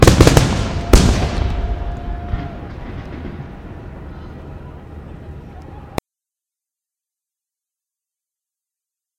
double hit distant cheer
recording of a double firework explosion with some distant cheering
ambience, cheer, distant, double, explosion, fire, fireworks, hit, loud, outside